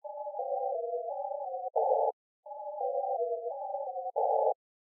Even more melodic patterns loops and elements.
image, sound, space, synth